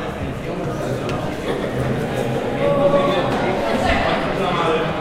people talking
Recorded with Zoom H4 at one cafeteria, this sound is normalized.